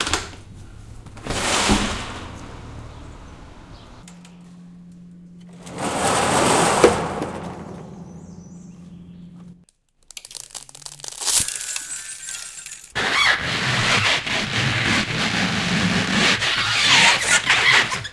Make Noise Listening Exercises Reel 1
Formatted for use in the Make Noise Morphagene.
acousmatic, field-recording, mgreel, morphagene